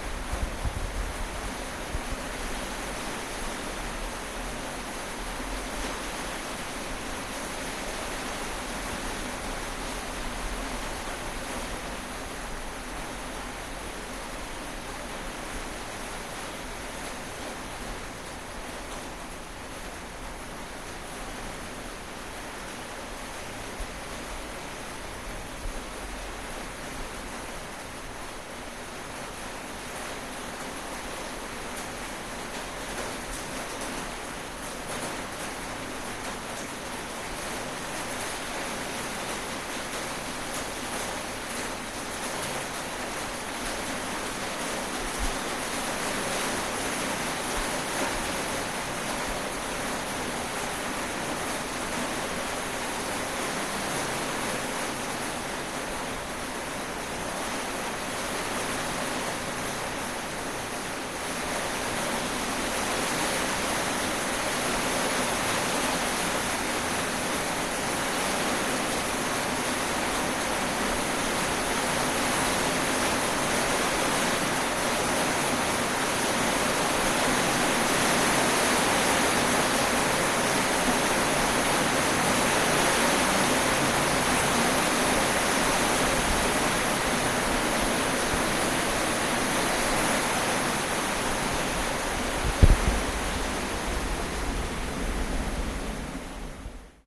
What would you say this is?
Rain sound from within of stairs with a plastic ceiling
interior, water, ambient, plastic, rain